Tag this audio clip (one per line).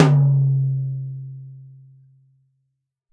1-shot
drum
multisample
tom
velocity